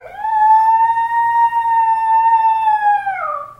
It's the call of the wild and shaggy knows how to howl.
dog, howl, shaggy